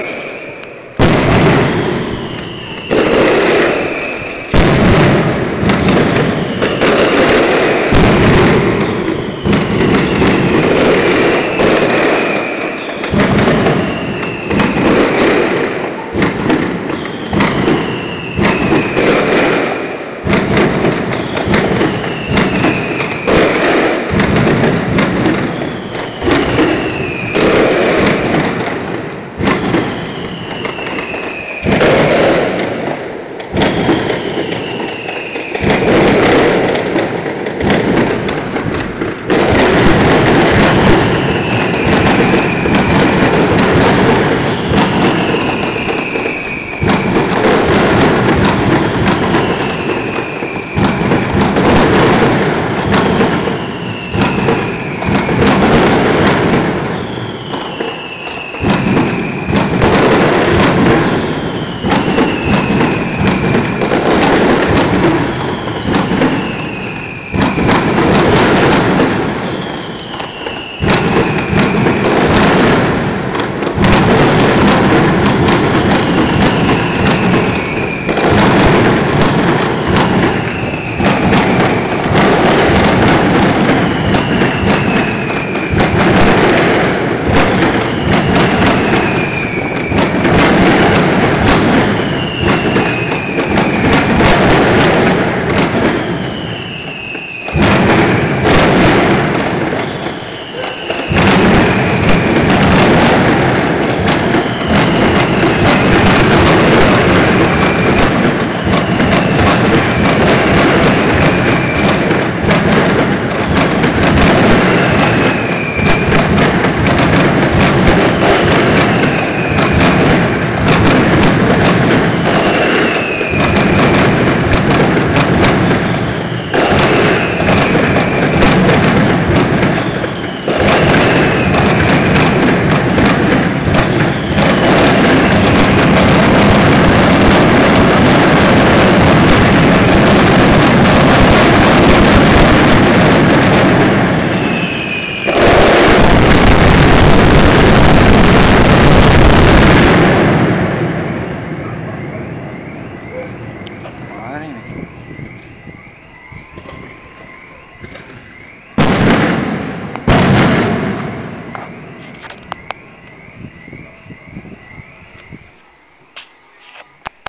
Mascleta from the "Medieval Market" in Benimaclet, Valencia, 2010
2010, fireworks, market, mascleta, medieval, mercado, spain, valencia